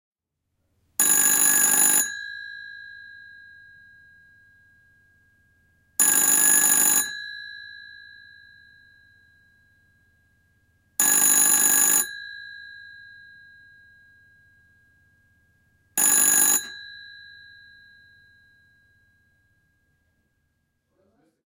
Antique Telephone Rings
An old dial plate telephone rings with a shrill bell.
Antique, Bell, Call, Dial, Phone, Plate, Ringing, Telephone